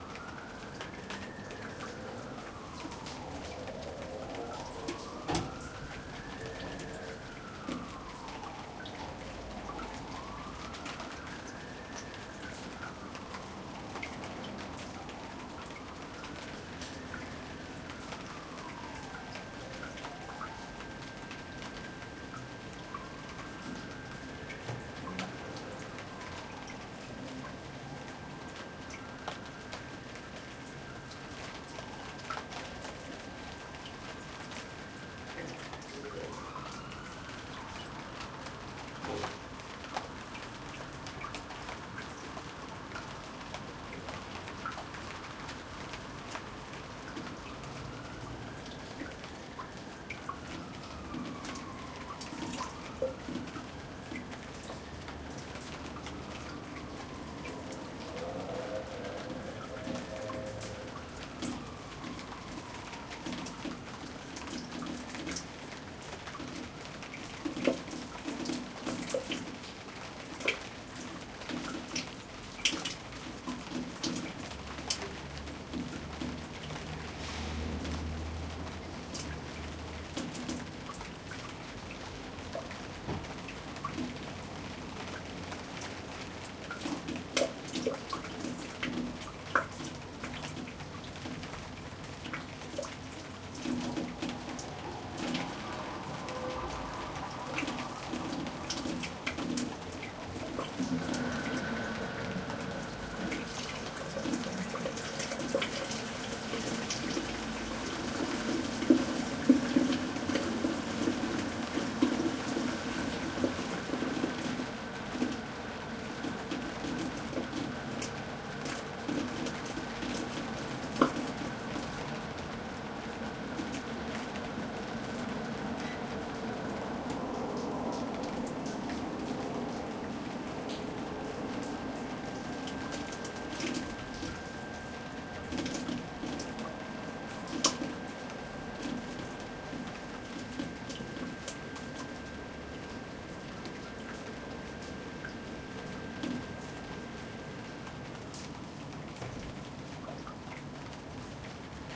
Raining, Urban Back Alley
Outside my old house on a rainy day.- Recorded with my Zoom H2 -
alley, backdoor, urban, rain, street